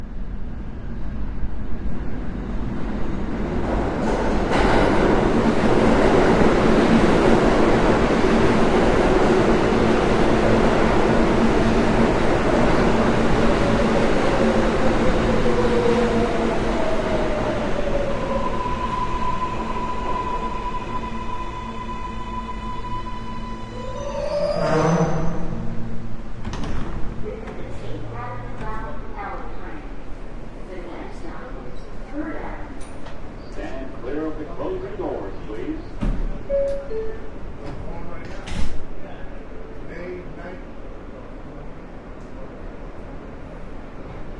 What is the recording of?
08.05: subway stops, brake squeal, doors open, recorded announcement
["next stop ... stand clear" etc.], doors close, some conversation.
Field recording on SONY D100 DAT with Core Sound Low Cost Binaural
Microphones.